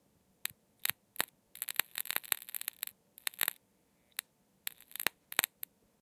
VERY weak Electric Spark Sound 1
A quick recording of a light switch that sucks in my house for foley. Recorded on the zoom H5 stereo mic. I cleaned up the audio and it is ready to be mixed into your work! enjoy!